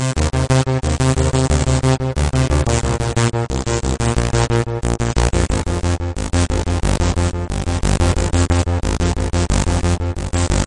The same sequence as rancidarp, with more effects like chorus and delay.
arp, saw, rancid, synth, extraneous, weird, sequence, strange